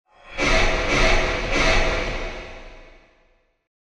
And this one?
Several blasts from a laser gun.
Recording Credit (Last Name):